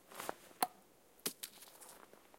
I recorded how I was throwing a cone in the forest.
throwing, forest